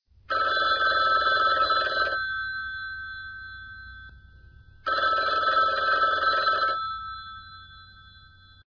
Classic sound of phone ring.